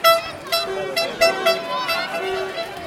Horn at parade
A child blowing a horn during a parade with crowd noise
ambient, chat, crowd, field-recording, parade, people